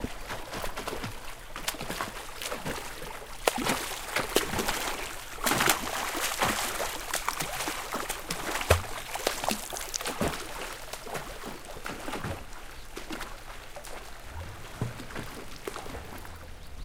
-Swimming and paddling in pool, left-to-right